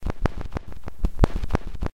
click of a needle on an old record; rhythmic feel would make it great for percussion, methinks
1-bar, lofi, detritus, turntable, hiss, click, glitch, field-recording, vinyl